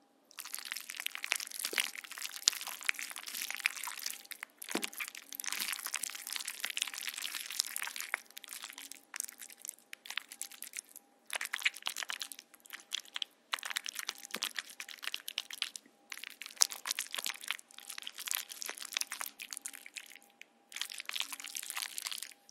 Swirling in alien substance
This sound can be used for different kinds of mass being moved.
alien, glibber, asmr, weird, brain, closeup, porridge